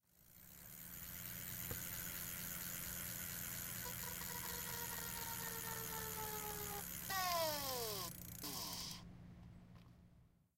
Bike Tire Slow Triple Stop
Stopping a spinning bike tire with an introduced inanimate object (not the hand). Recorded on Stanford Campus, Saturday 9/5/09.
aip09, buzz, bicycle, tire